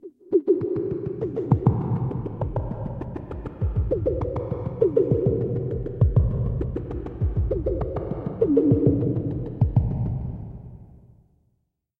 High resonant frequencies in an arpeggiated way at 100 BPM, 4 measures long at 4/4. Very rhythmic and groovy! All done on my Virus TI. Sequencing done within Cubase 5, audio editing within Wavelab 6.